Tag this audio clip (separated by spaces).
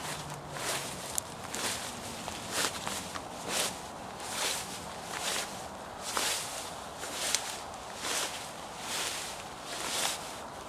Long Walking